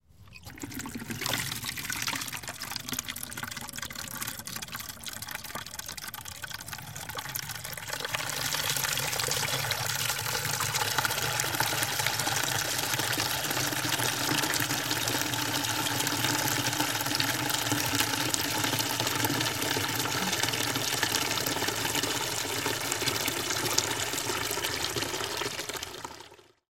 Water pouring from one plastic container into another through a small plastic tap.
Recorded with a Zoom H2. Edited with Audacity.